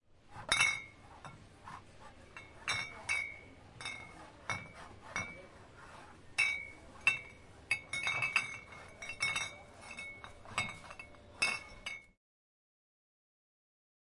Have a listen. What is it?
Glass bottles clinking.
Recorded on Zoom H4n.
Close perspective, inside.